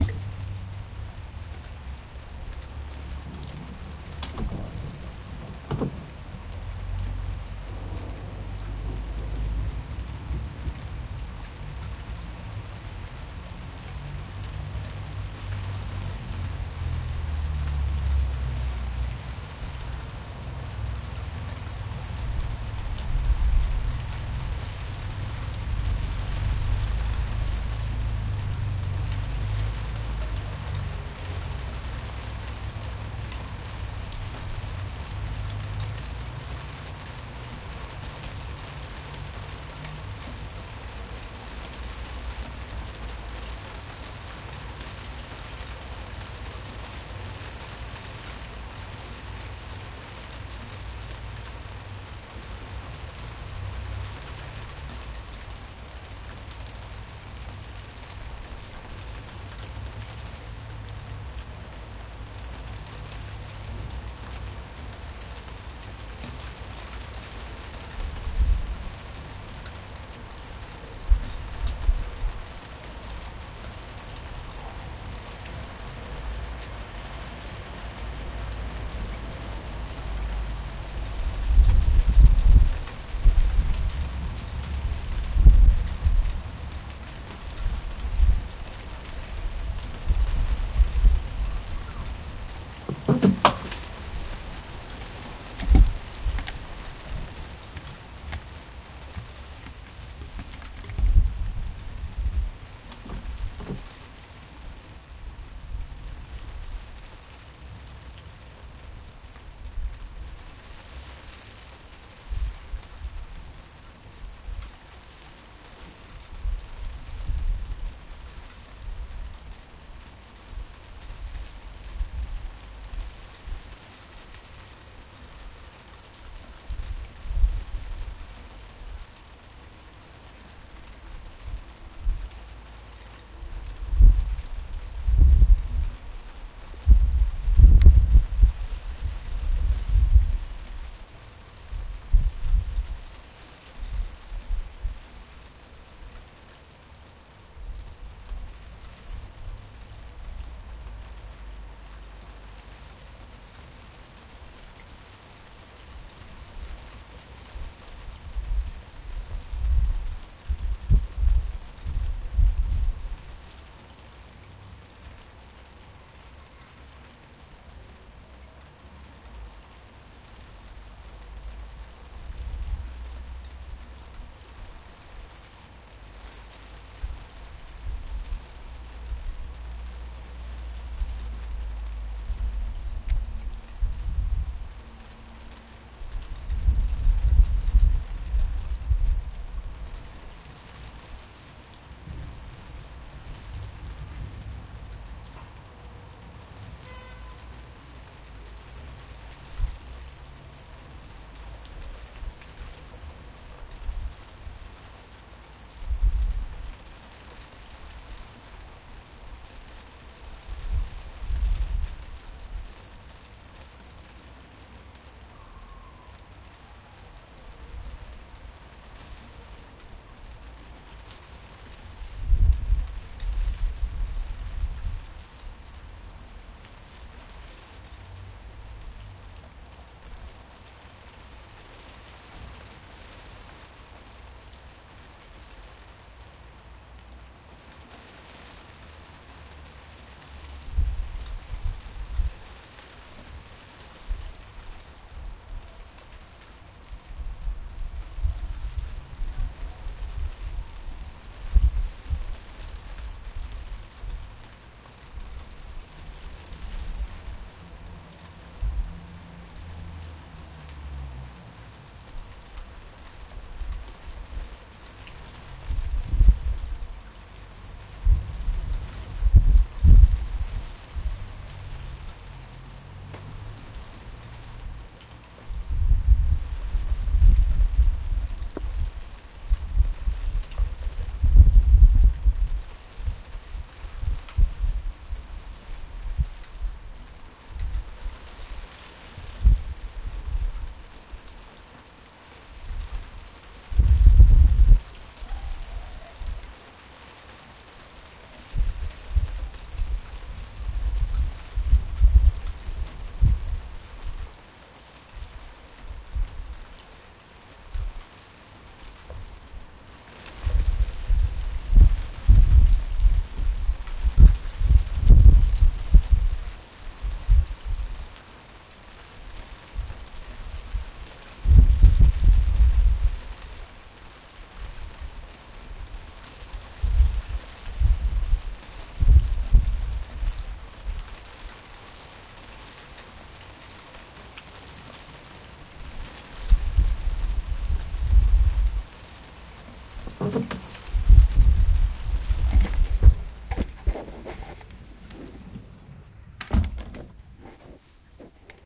Sonido de lluvia desde mi terraza. Grabado con tascam dr-40